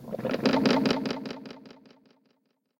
A strange and creepy sound effect. Use at will.
weird, horror, creepy, strange, sci-fi